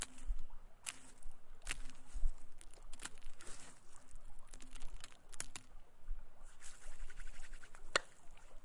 clicka and clacks
clacks, clicks